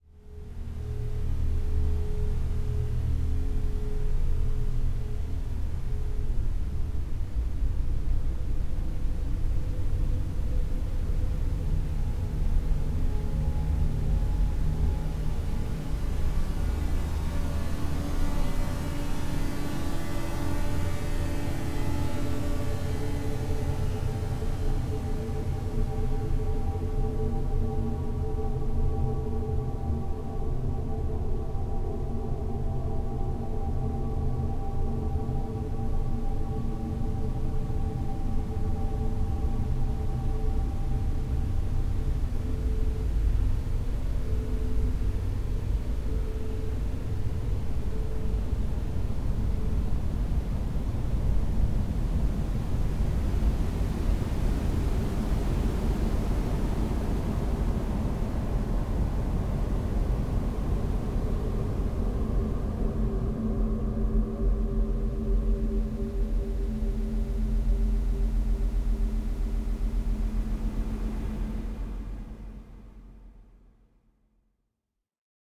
techno fx-03
techno fx pad texture plants rumble atmospheres ambiences noise dark Drum and Bass